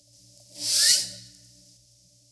Sci-Fi Door
A sci-fi-sounding door effect.
door, door-open, sound-design, sci-fi